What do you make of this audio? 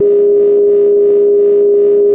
Beep Long
Monotron-Duo sounds recorded dry, directly into my laptop soundcard.
Long beep.
bleep, beep, tone, electronic, monotron-duo, korg